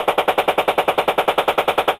m230 chain gun burst 2
agression, army, attack, canon, fight, patrone, pistol, rifle, schuss, shot, sniper, war, weapopn